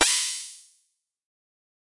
Fx hihat
gforce, imposcar, percussion